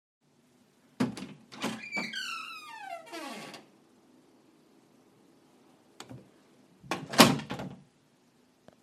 creaking door 1
Open and close a squeaky wooden door
creaky, squeaky, wooden-door-closing, wooden-door-opening